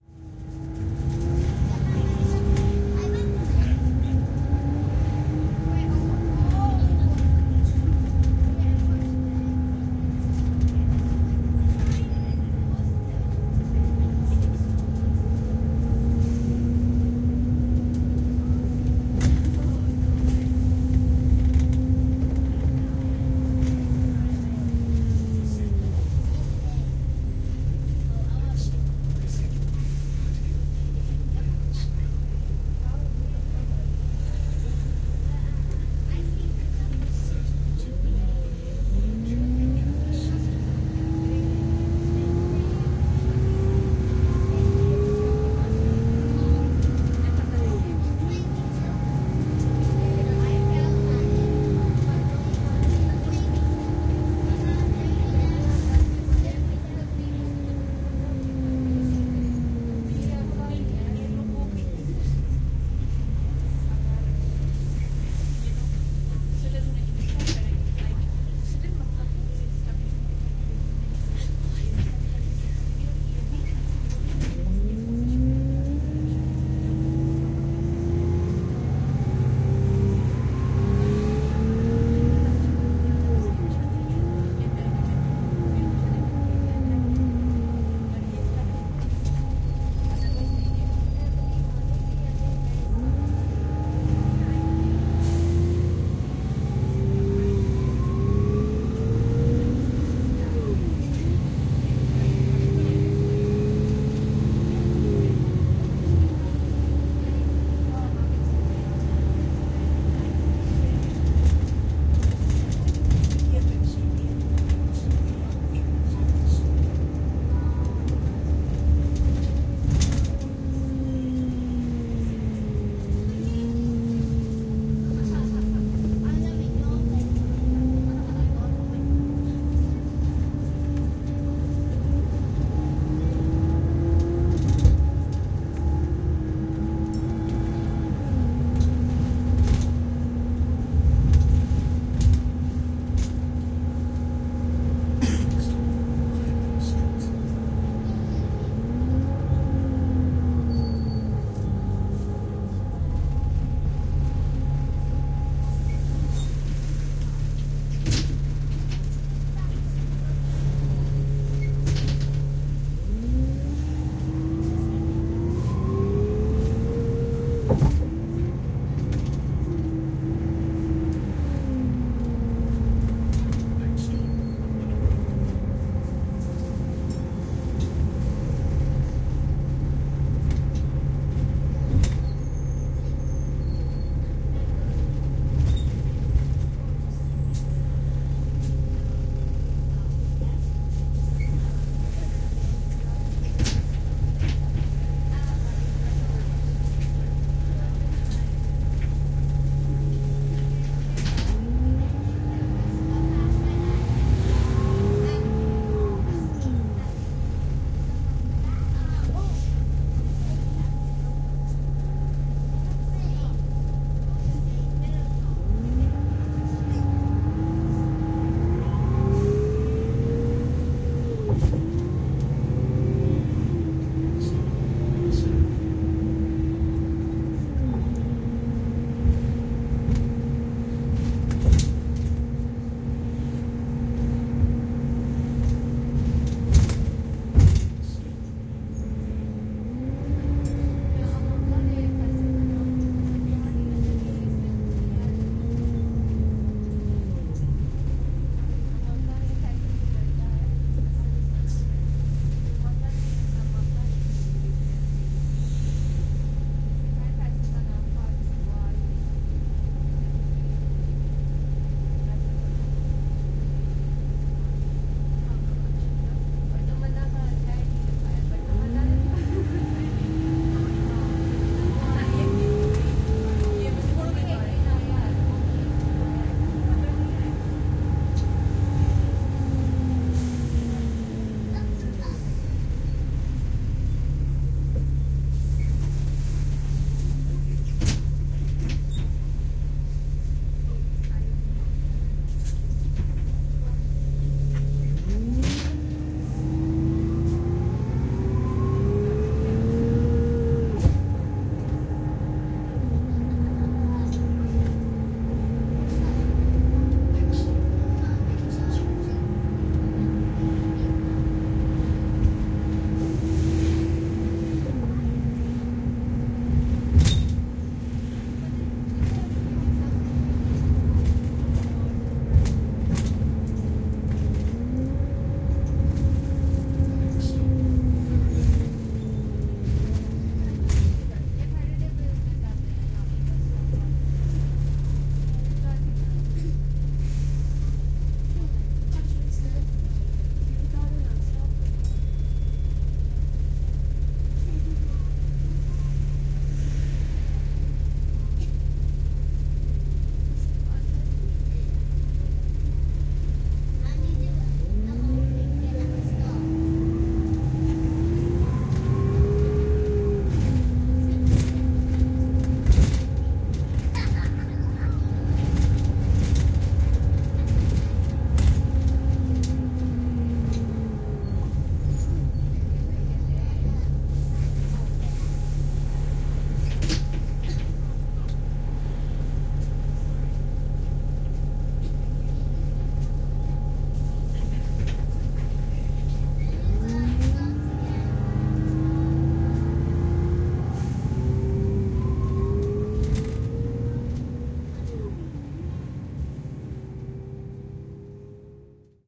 Bus ride
Captured a bit of a bus journey on a single decker out of town... sat towards the back to focus on engine noise but still reasonably busy. Faint background sound of automated announcements before each stop and doors opening / closing. Voice Recorder Pro with Samsung Galaxy S8 internal mics > Adobe Audition.